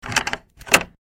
A door unlock sound from our shed. It was recorded on my Walkman Mp3 Player/Recorder and digitally enhanced.